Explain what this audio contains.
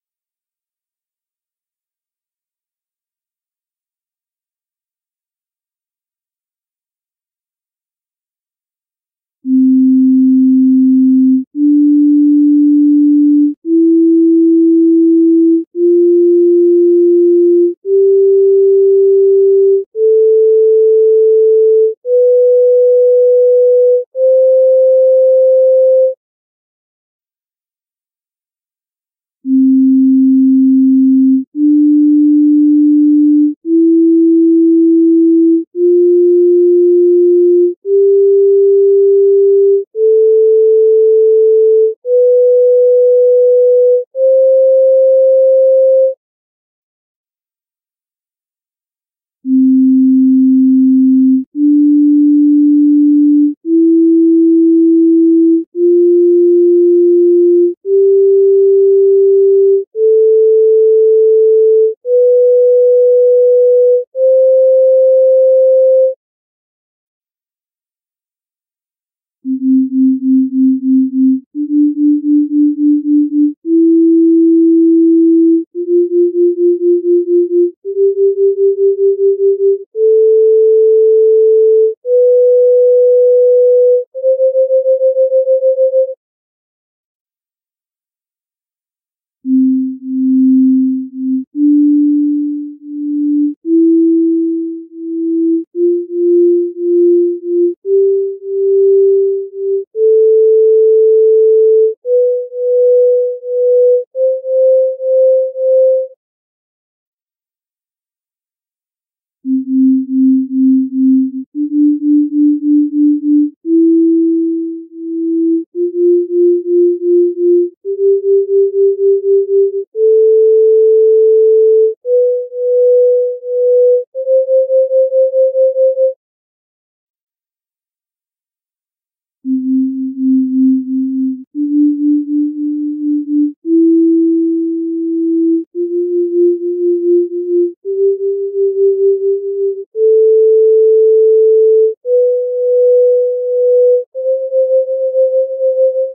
Do Major scale in three system. Firstly one by one, then Pythagoras + Just Intonation (5 limit), Pythagoras + 12TET, Just Intonation + 12TET, and at the end Pythagoras + Just Intonation + 12TET alltogether. Reference notes are La4 and their frequencies are 440Hz each.
Sinus waveform.
Prepared using Csound.